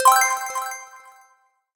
Jingle Win Synth 03
An uplifting synth jingle win sound to be used in futuristic, or small casual games. Useful for when a character has completed an objective, an achievement or other pleasant events.